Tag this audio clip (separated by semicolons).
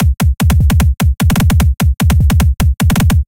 drum; hardcore; kick